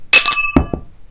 two-ting-drop
Dropped and threw some 3.5" hard disk platters in various ways.
A couple of tings and impact
metallic, clunk, drop, ting